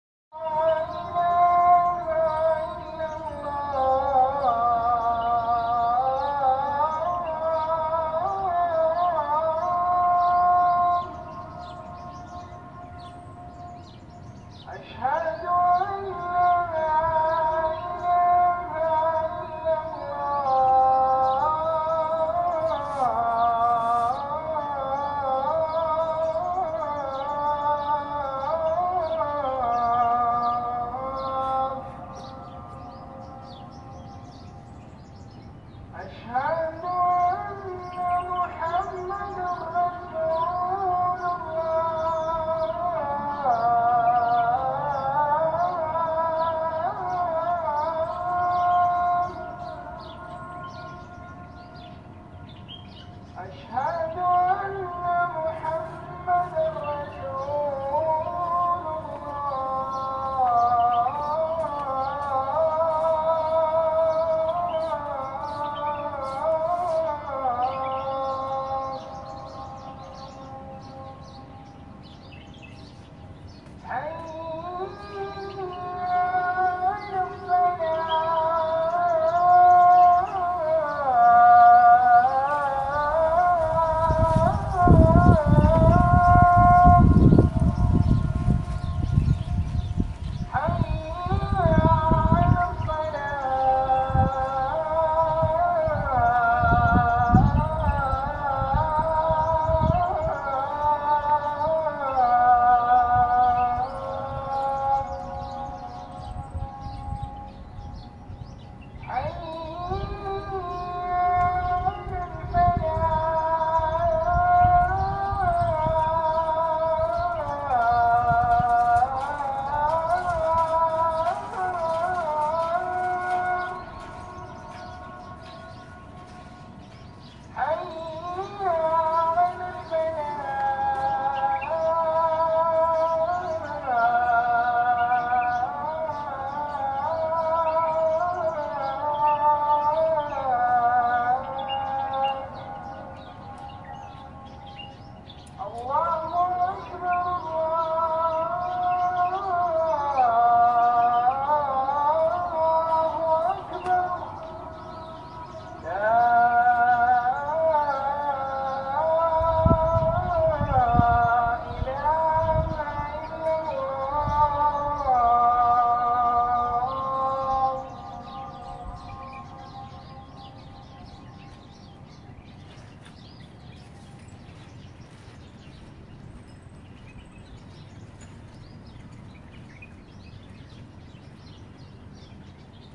Muezzin in Al Ain, United Arab Emirates with birds
Ein Muezzin in Al Ain 2017
Arab, Arabische, birds, Emirate, Emirates, gel, Hall, Muezzin, UAE, United, V, VAE, Vereinigte, wind